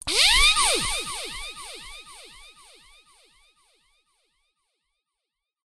drill delayed
sounds like a pneumatic drill with added delay